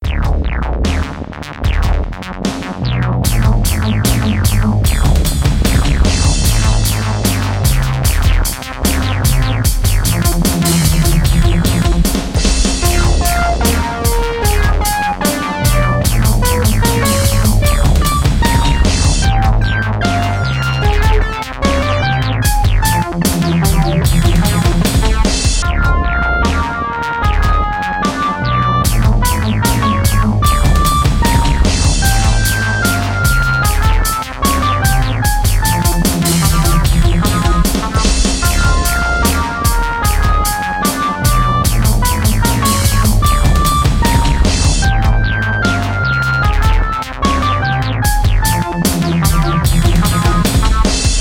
Short but effective original gamer's loop at 150 BPM, Key of D-minor.